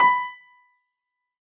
Piano ff 063